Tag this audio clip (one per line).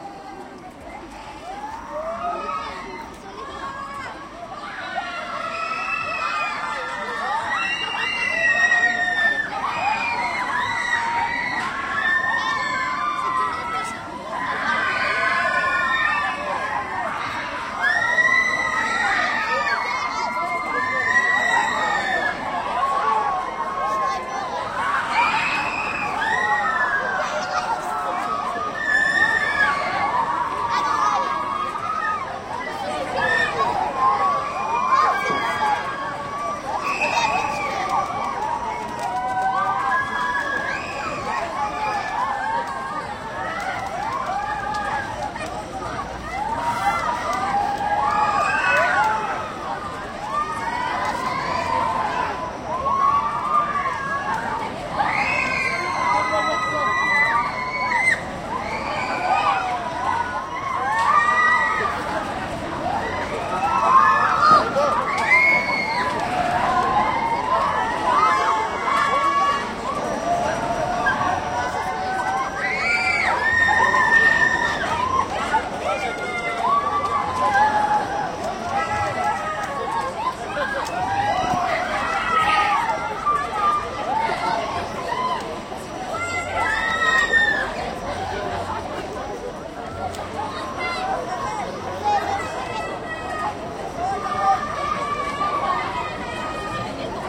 scream
funfair
roller-coaster